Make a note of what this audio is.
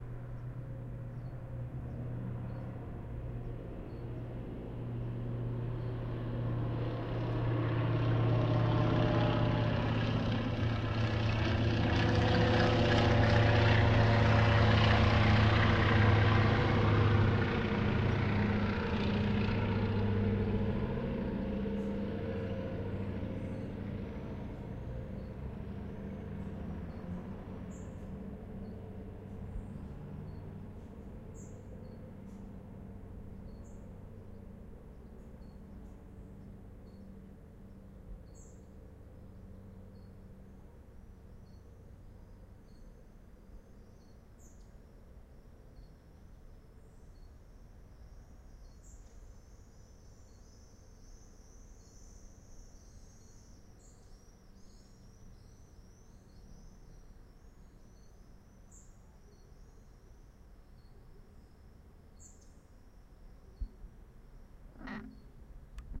Low passing aeroplane, An-2R (HA-MEN), near
An-2R (HA-MEN) passing by quite low (biochemical anti-mosquito spray)
Distance: ~300 m
Recorded with Zoom H1, volume: 50
antonov, plane